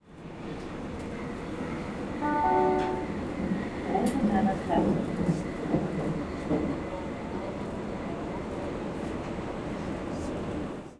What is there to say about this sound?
U Bahn announcer Rosenthaler Platz inside U Bahn carridge